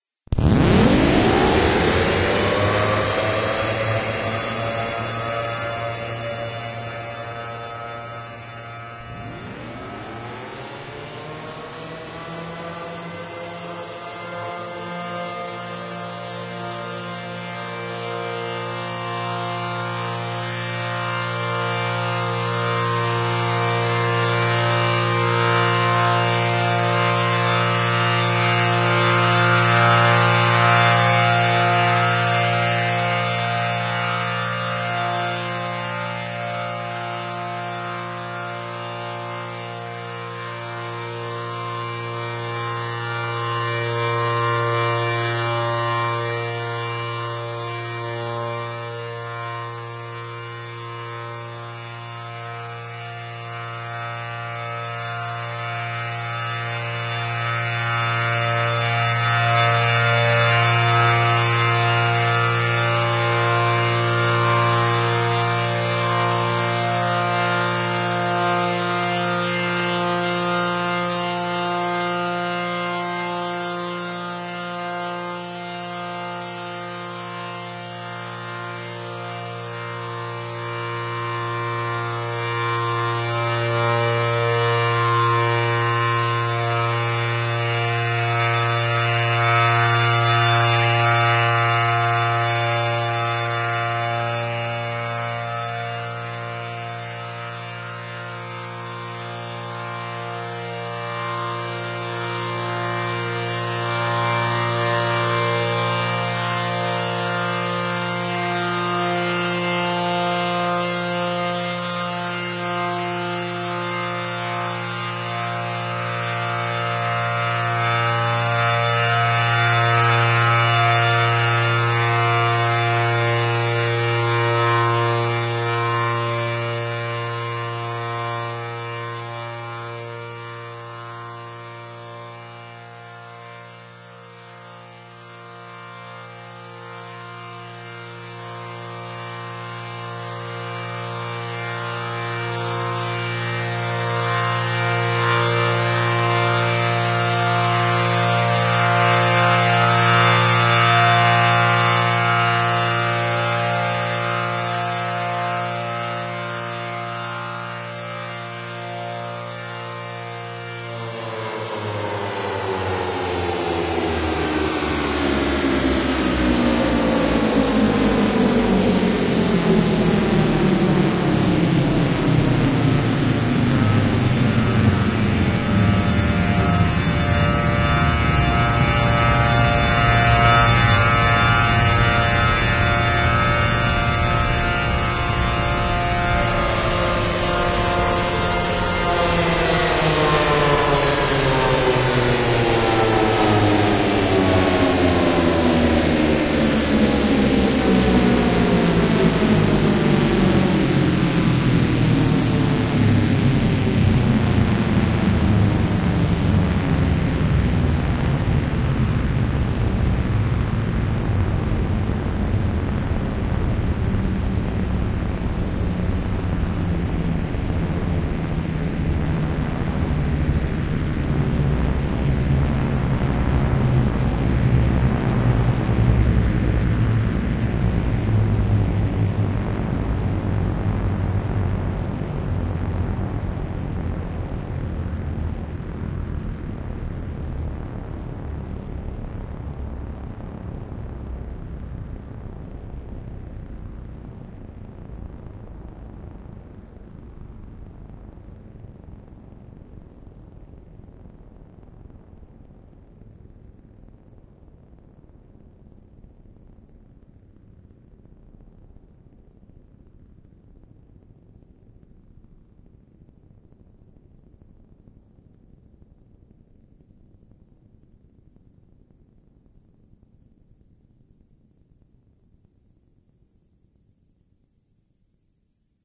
Random Siren Ambience
This is a Synth of a 500AT 10/12 Port, 500AT 9/12 Port, and a Fantasy 500AT 8/10 Port. All Sirens sound Alert in an All-Clear Simulation.